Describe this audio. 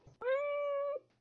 A 'meow' sound from my kitty Luna. Recorded with my microphone.